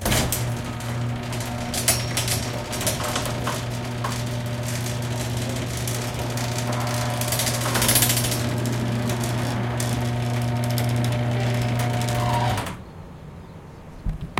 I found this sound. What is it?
mechanical garage door opener, door closing, quad
Quad (L,RLsRs) of a mechanical garage door opener closing the door. Recorded at a near perspective. Recorded with a Zoom H2n in surround mode.
industrial, machine, mechanical, quad